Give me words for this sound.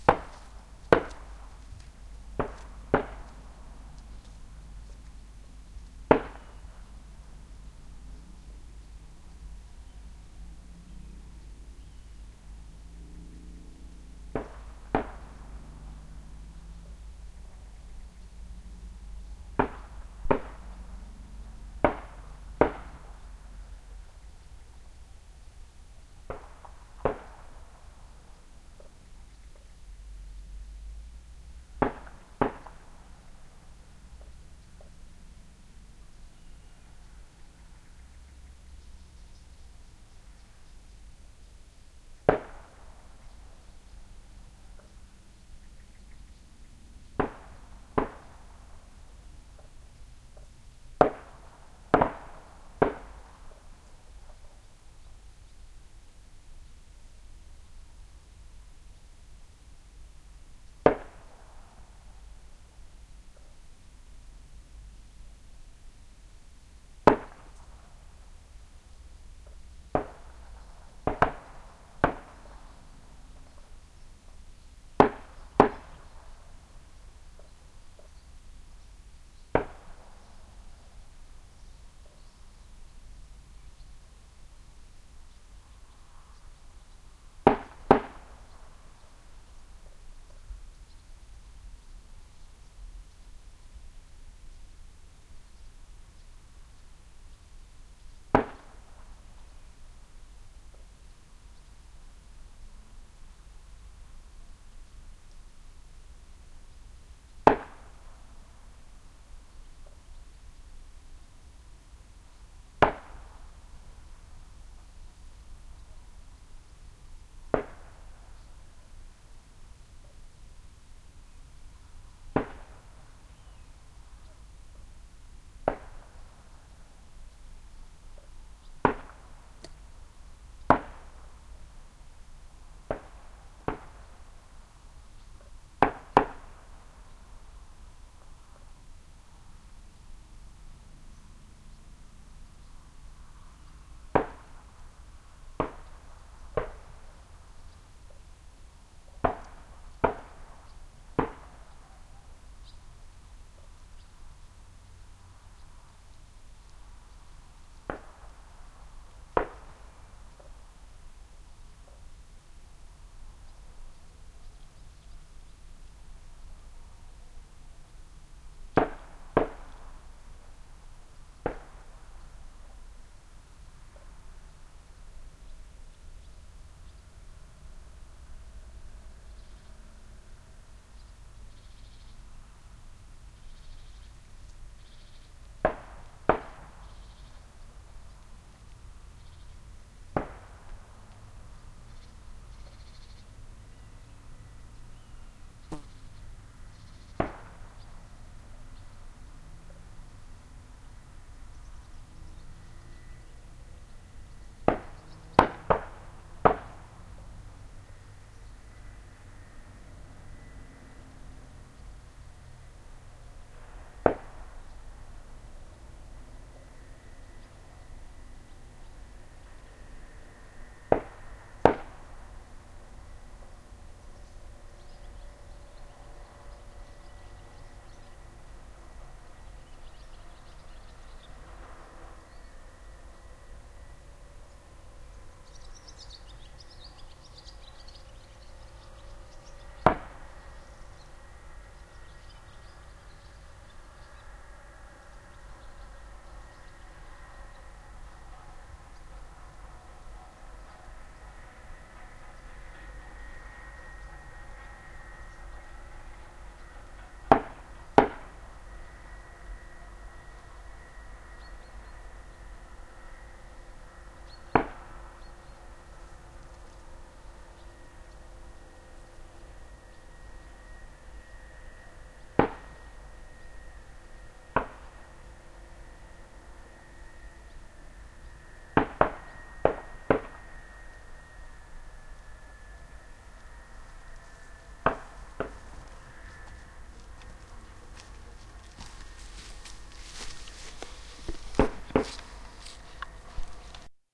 Gunfire in the distance 01
Recorded in the fields, close to my garden an almost quiet night. In this recording you can hear gunfire from the local fireing course, a couple of kilometers away. It's a clear day and not too windy, so the sound travels quite nice, as you can hear. There's also some noise in the background, from a farmer who's harvesting, some cars and a lot of birds.
This was recorded with a TSM PR1 portable digital recorder, with external stereo microphones. I haven't edited this soundclip on purpose. Noisereduction is doing harm to the gunshots, so i decided to leave it as it is.